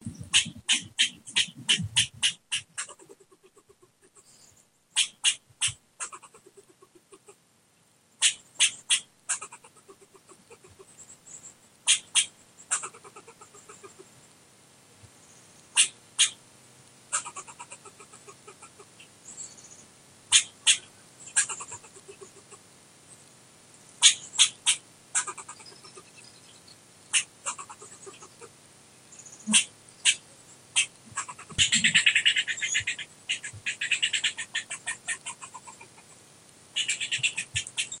Squirrel Chatter 4 3 2016 Lincoln Nebraska
The sound of an aggravated squirrel not thrilled that our dog is patiently waiting for it to descend from its perch in one of our backyard trees.
field-recording, aggravated, chattering, Squirrel